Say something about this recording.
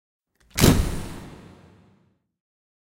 Vintage Camera Flash Powder and Shutter
Old flashgun powder is lit as the shutter fires, providing a dark old-press 1920s feel.